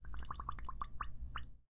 06 Sumerje moneda
Sound of an object submerging in the water
Sound of bubbles